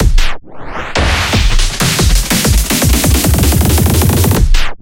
Granular Reversed
This is based on a drum loop using One from the famous Dubstep samples then uising a Dblue Glitch FX